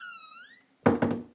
A door closing
close, closing, door, shut